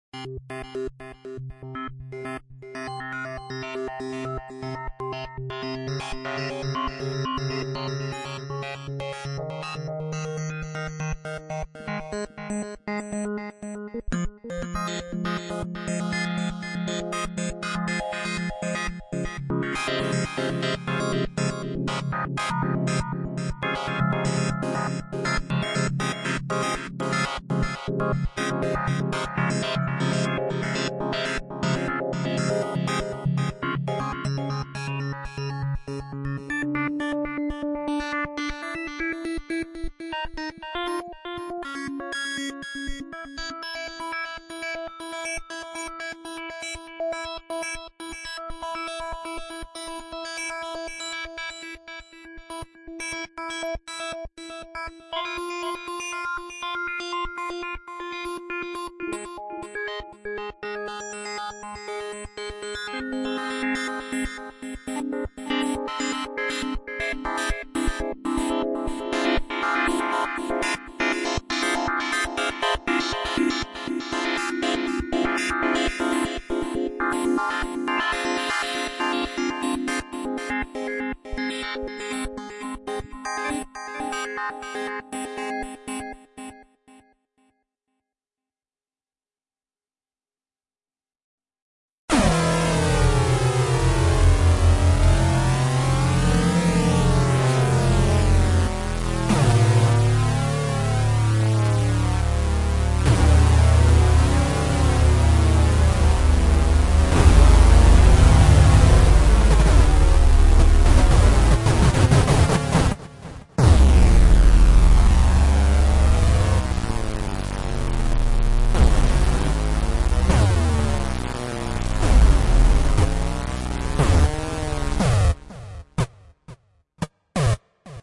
Spy on the run
This is a techno-styl song for movies and sch as action movies and so on...